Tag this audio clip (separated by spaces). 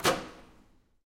door loud loudly manual mechanic microwave opening oven